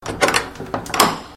First raw audio of opening a wooden church door with a metal handle.
An example of how you might credit is by putting this in the description/credits: